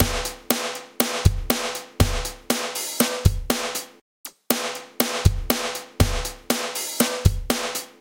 Raw Power 005
Produced for music as main beat.
raw, industrial, rock, drum, loops